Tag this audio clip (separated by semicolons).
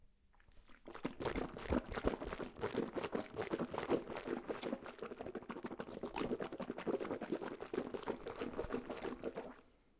buccal; clean; dentist; gargling; tooth